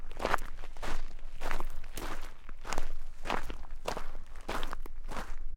I recorded my footsteps on a gravel driveway.